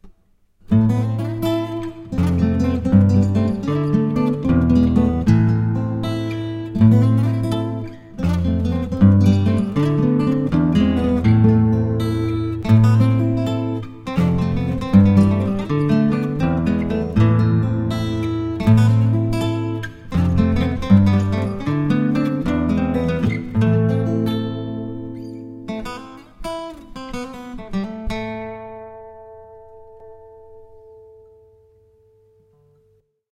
This is instrumental composition, where i played in acoustic guitar, some like slow flameko sound. I recorded intro and coda in one composition.
Temp - 120 bpm.
Size - 3\4.
tonality - Am.
3, 4, acoustic, chord, clean, coda, flamen, guitar, intro, melodical, minor, moderato, o